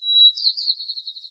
A piece of Nature. Individual bird chirps and phrases that were used in a installation called AmbiGen created by JCG Musics at 2015.
birds, birdsong, nature, bird, forest, field-recording